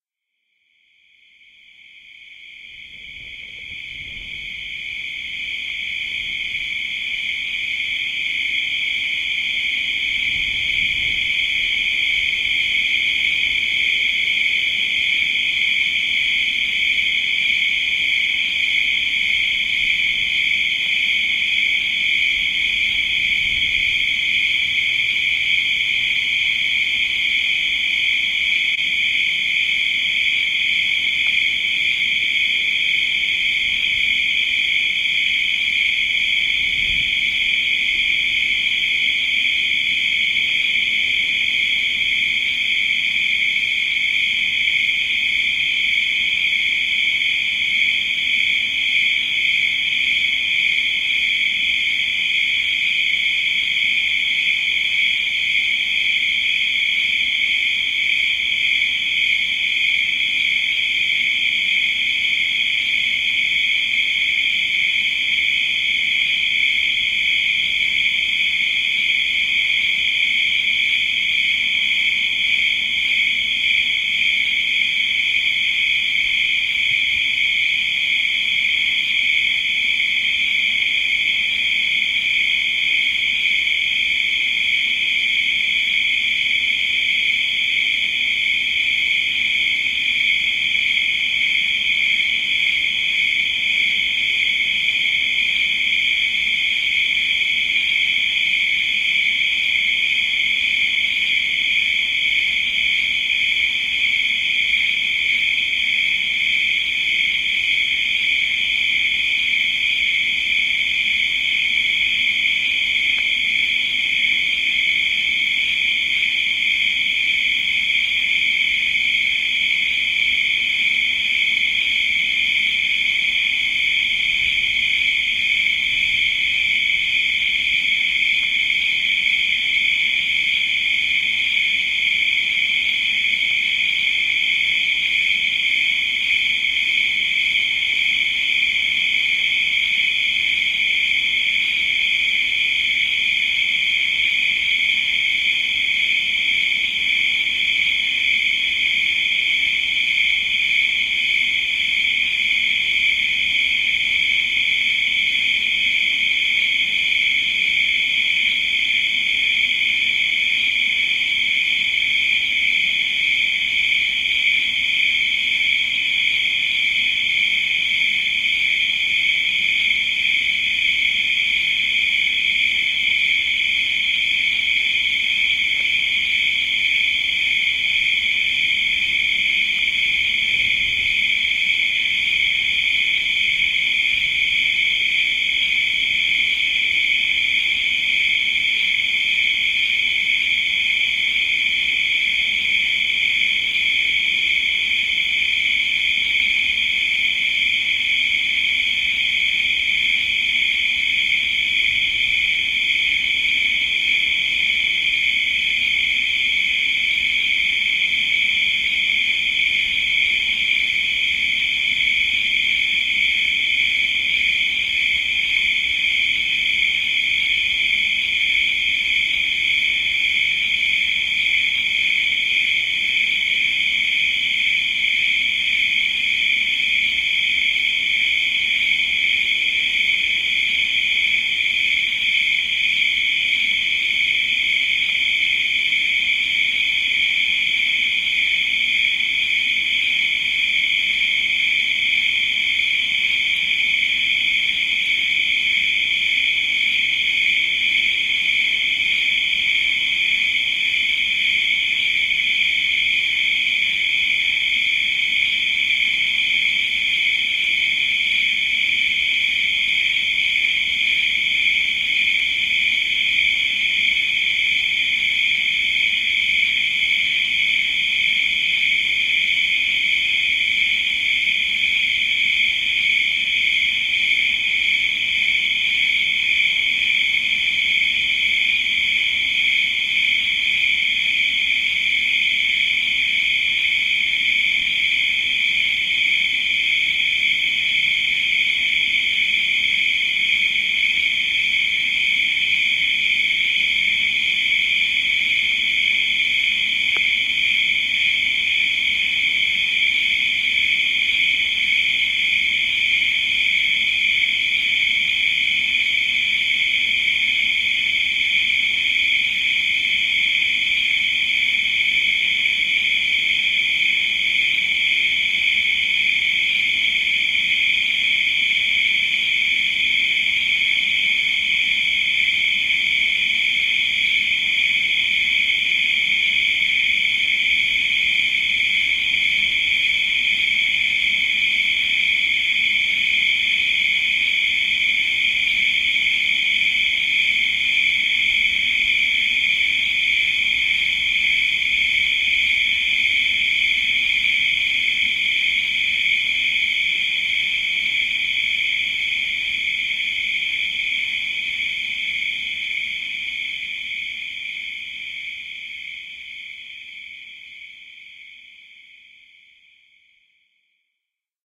sherman 29aug2009tr02
ambient, california, crickets, sherman-island